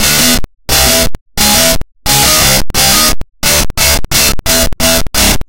either DVS Digital guitar VSTi paired with a buncha VST's or the SLaYer VSTi.